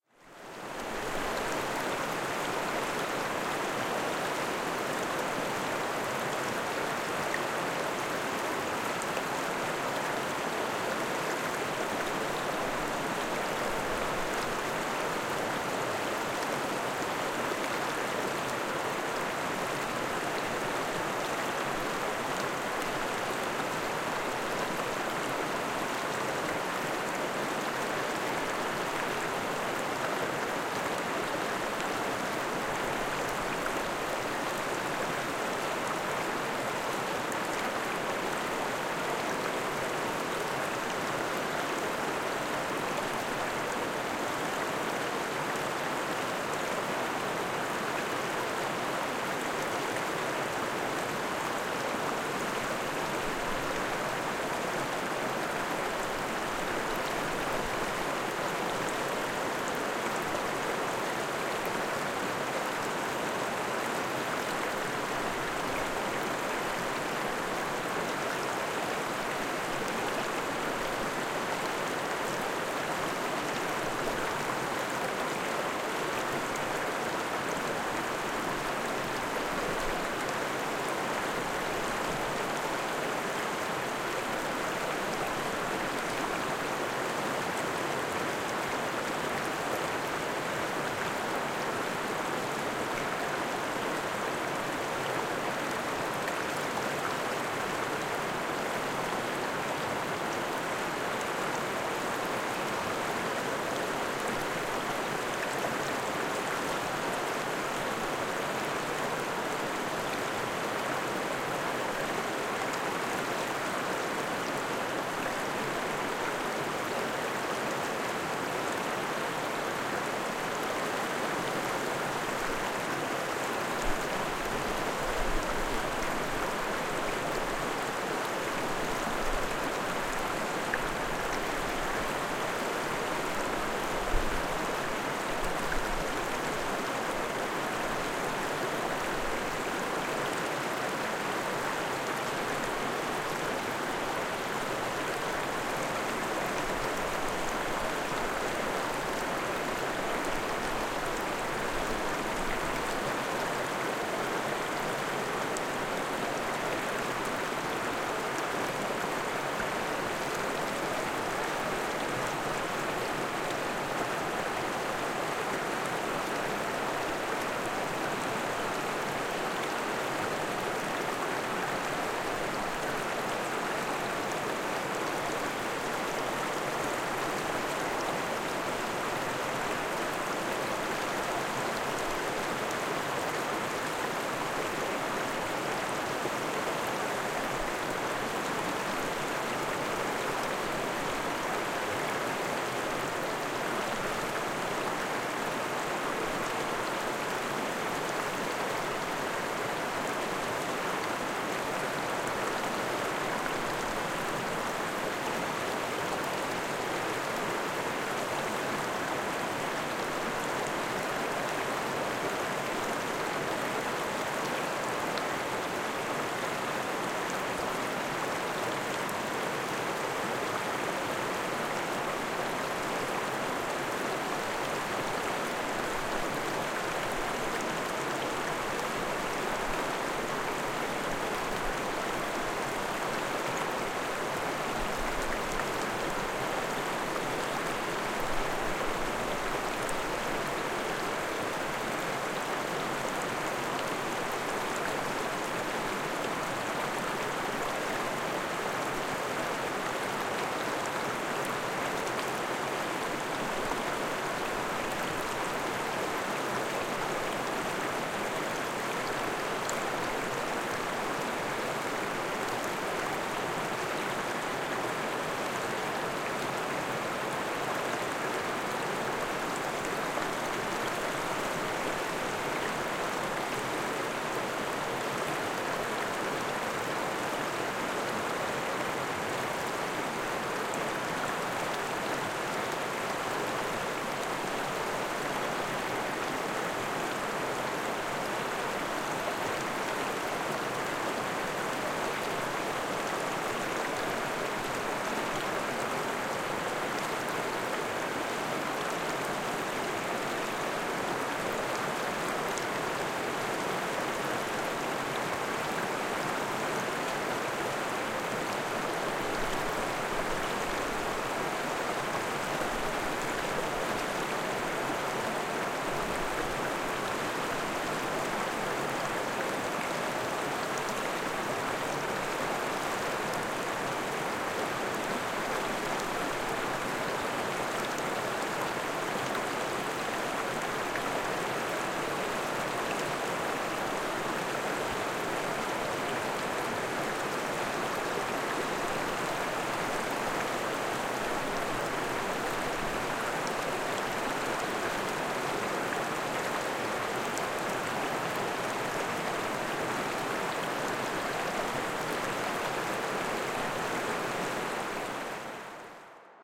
The sound of a small river in east Iceland. Recorded on a Zoom-H6 microphone